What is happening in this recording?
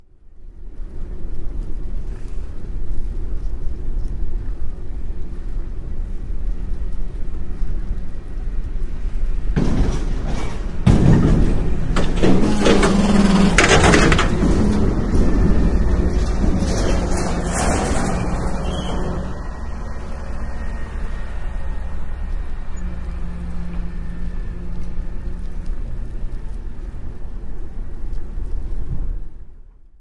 A garbagetruck empties a garbagecontainer and shreds its content. I'm passing the scene on my bike heading for my work so it must have around 08:20 pm. Recorded with in the inside pocket of my jacket an Edirol R09 someday in October 2006.